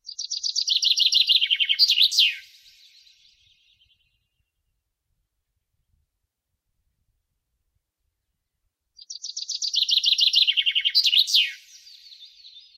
A mono field recording of a finch singing. You can hear some other finches in the background.
bird, finch